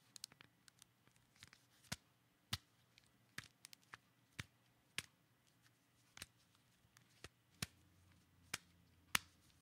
Handling a flip phone